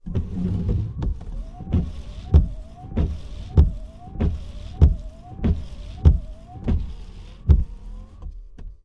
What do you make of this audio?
sons cotxe eixugaparabrises 4 2011-10-19
field-recording, car, sound